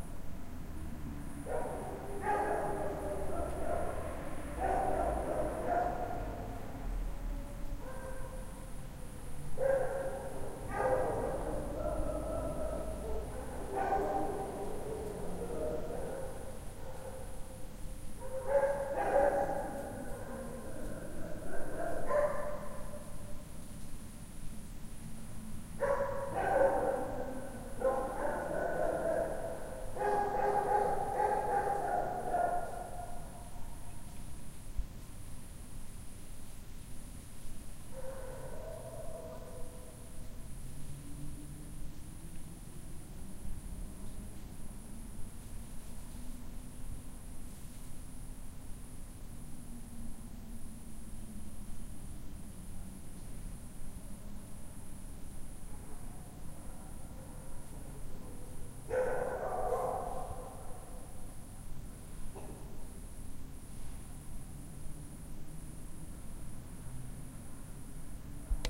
stray dogs
A very common street summer night ambiance for a street in Bucharest. You can also hear the crickets sounds.
night, bucharest, barking, dogs, crickets, street